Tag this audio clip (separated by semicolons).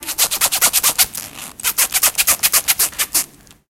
Belgium; CityRings; mySound